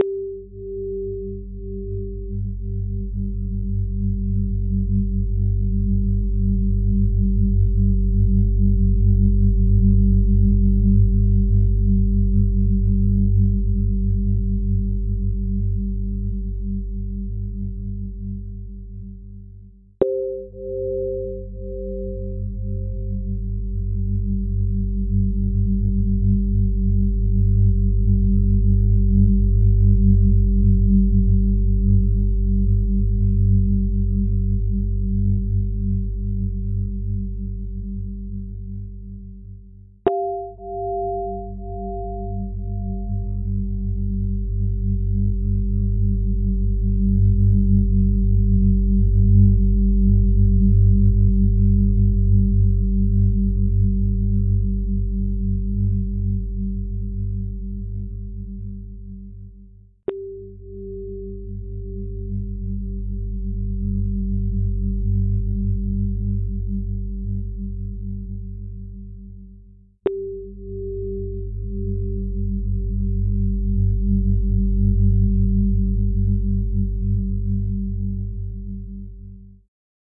Pulse Breath with Bells version 1
It reminds me of a tape I have that contains Tibetan chants. This creation was done using sine waves of slightly different pitch close to G in three octaves. One bell sound was produced with G and C and another with G and F. Another bell occurs three times and is only G.
bell, binaural-beats, chant, G